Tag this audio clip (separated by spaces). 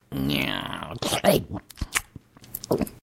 Growl sip